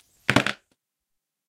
Balloon-Strain-06
Strain on an inflated balloon. Recorded with Zoom H4
strain, balloon